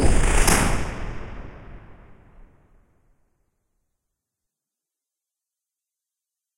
Something very mechanical sliding in a hangar
mechanical, echo, close, fx, mech, door